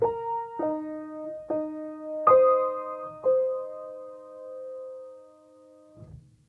Piano octave melody
Some punches and touches on piano
octave, piano